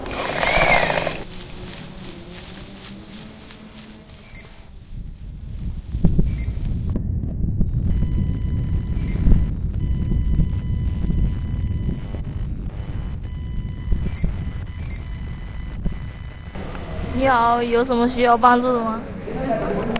Caçadors de Sons Joan dAustria 02
Soundtrack from the workshop "Caçadors de sons" by the students from Joan d'Àustria school.
Composició del alumnes de 3er de l'ESO del Institut Joan d'Àustria, per el taller Caçadors de sons.
Cacadors-de-sons, Tallers, Barcelona, Fundacio-Joan-Miro